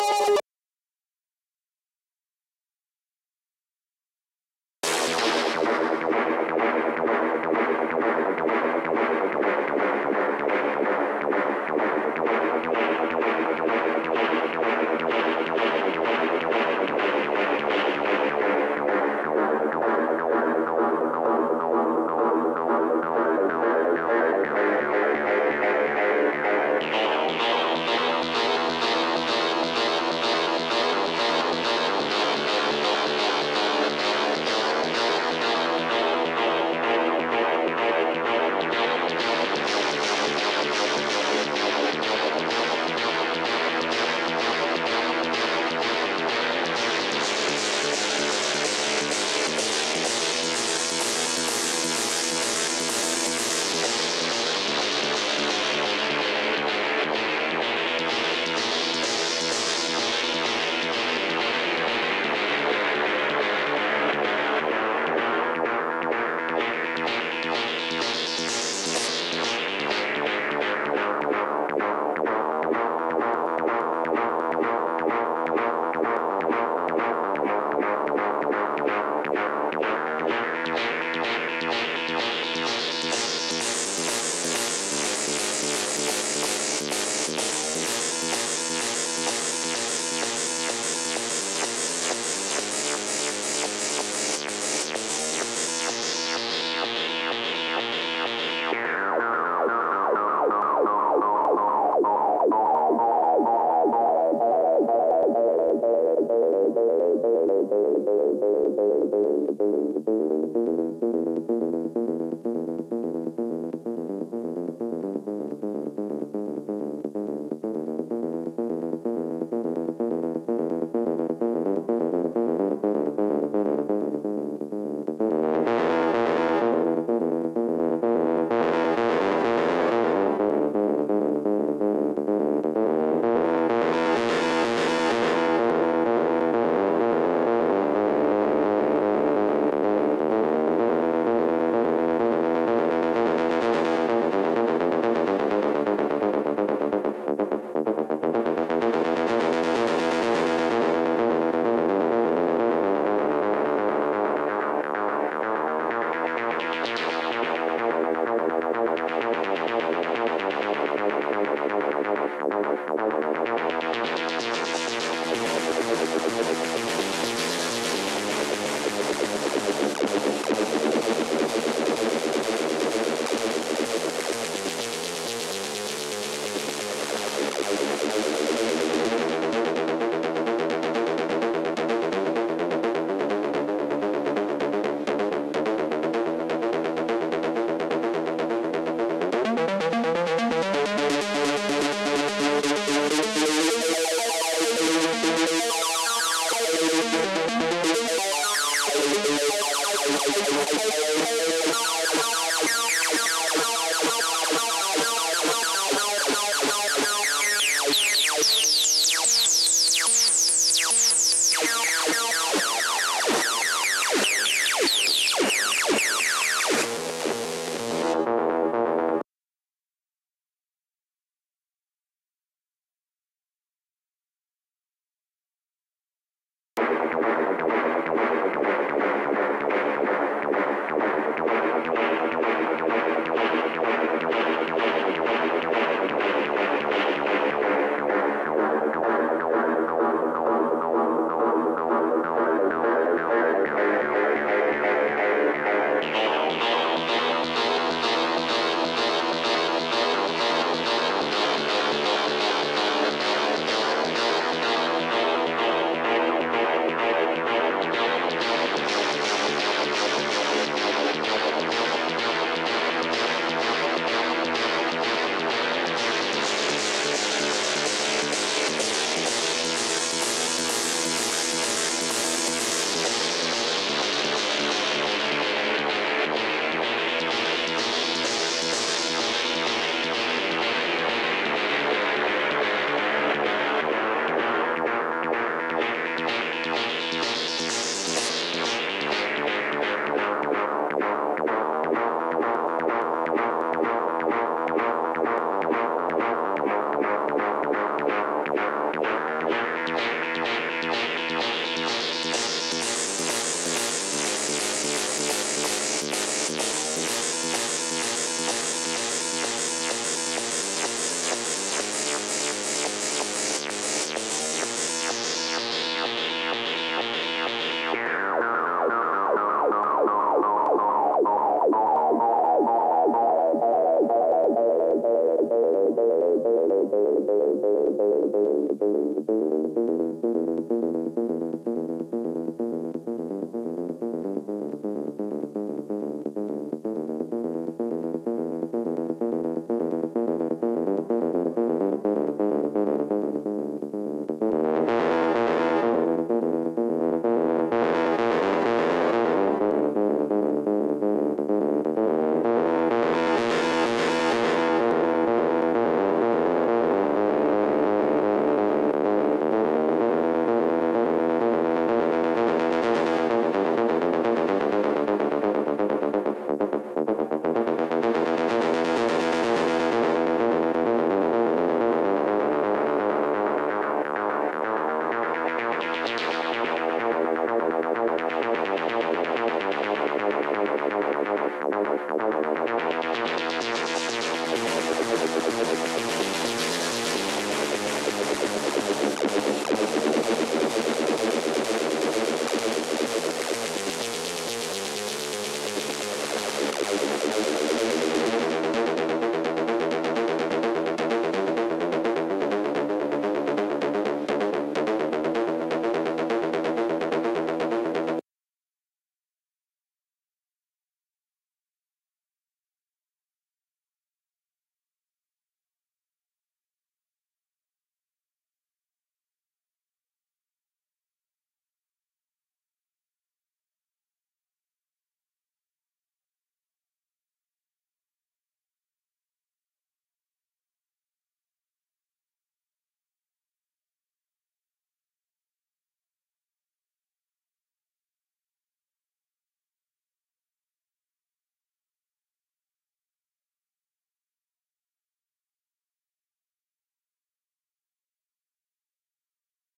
Acid Live Sample 1
Acid sound for sampling, recorded with Roland TB-03
Acid, Psychedelic, Experimental, Mental, TB-03
303, acid, live